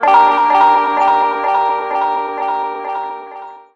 Triad Gminor chord played with an electric guitar with a bit of distortion and a strong short-time delay.